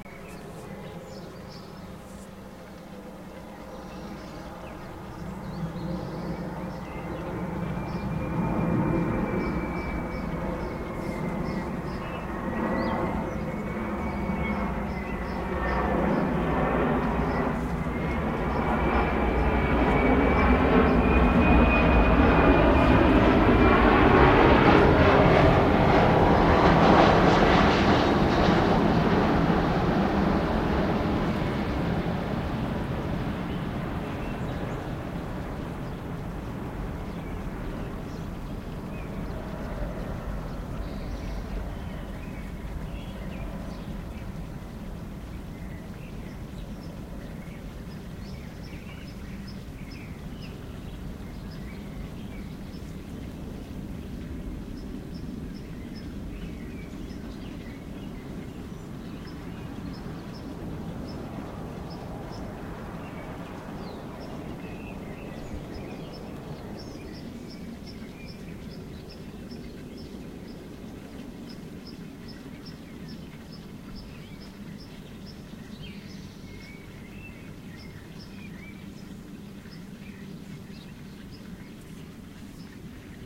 Balkon [audio test] at Behringersdorf 1970-01-01-12-00-00
wasser, balkon, sommer, behringersdorf
balkon, behringersdorf, wssser